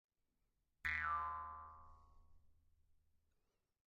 Jews Harp- Single note
A recording of a jaws harp making a single note. Recorded with a behringer C2 pencil condenser into an m-audio projectmix i/o interface. Very little processing, just topped and tailed.
western, boing, Jaws, harp